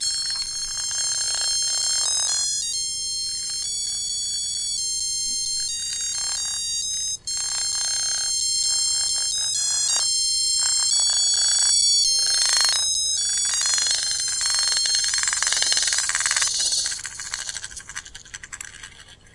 musical top
Toy top that lights up and plays music when you spin it. It plays jingle bells. I recorded it with my radio shack clip on microphone and then ran noise reduction in cool edit.
top, music, electronic, beep, christmas, spinning, bells, jingle